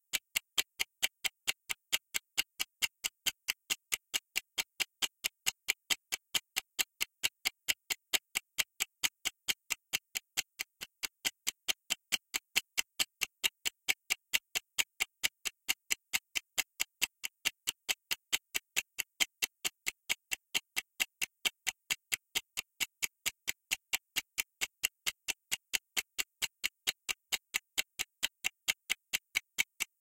Ticking Timer
If you enjoyed the sound, please STAR, COMMENT, SPREAD THE WORD!🗣 It really helps!